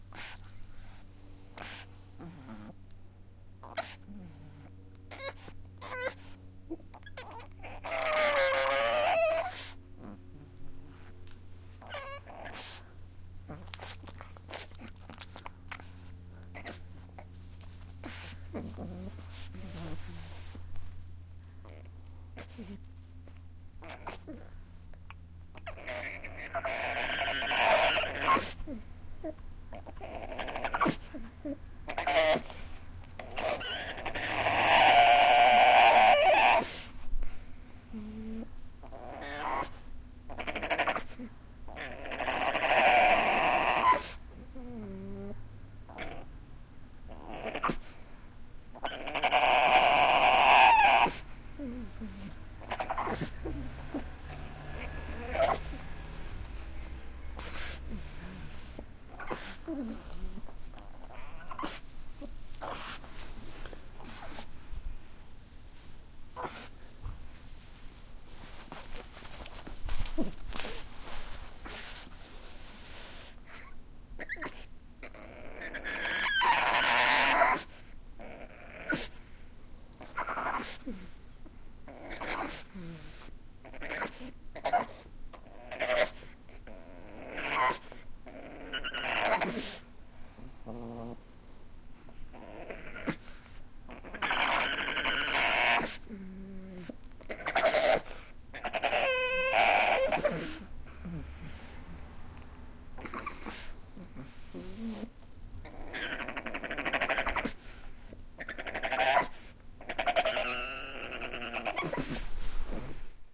02 A pug weeps 1:14:2008
A small pug makes strange whining sounds. Recorded with built-ins on a Sony D-50, close.
whine, dog, pug